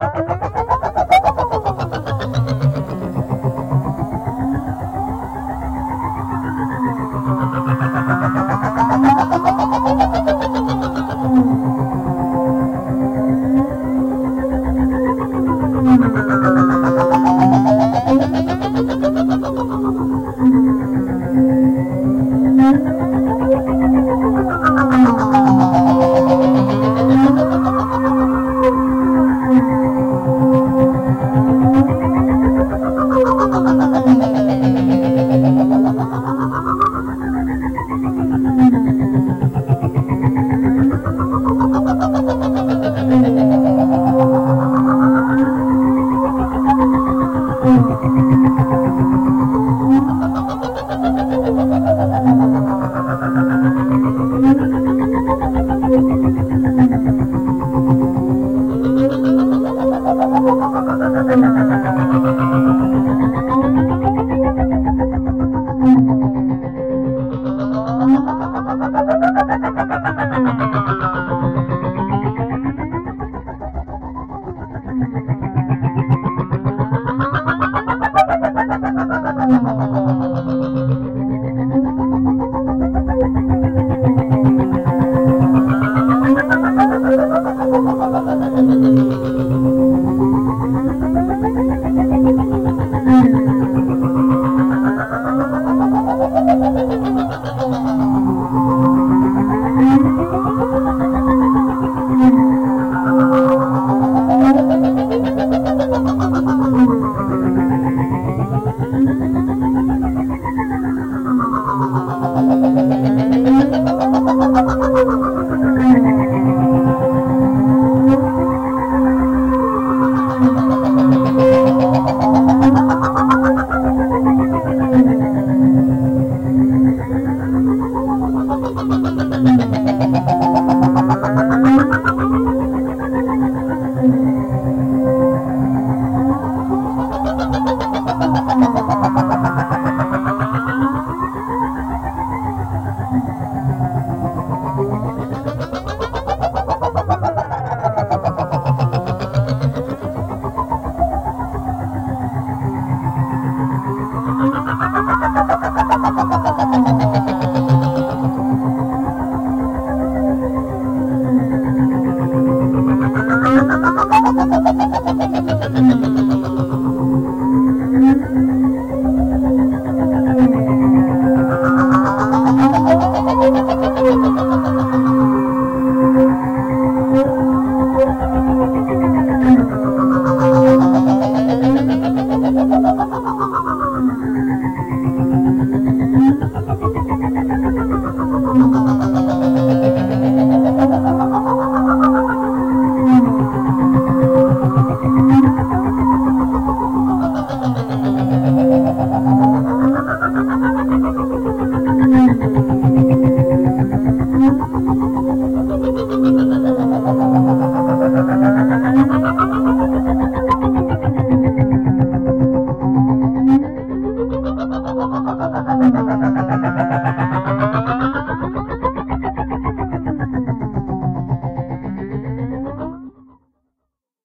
3-40min drone
Drone Made with Ableton 3.40 long